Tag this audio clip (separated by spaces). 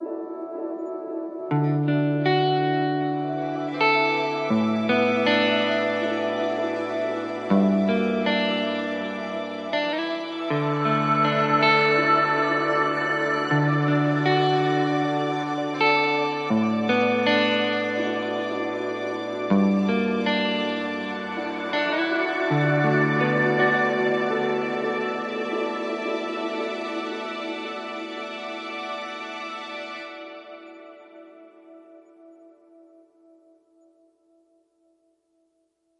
cliff ambient sunset guitar coast Atlantic ambience ocean slow field-recording surf love rock indie sea sex mellow happy soundscape north-coast emo waves summer skaters city urban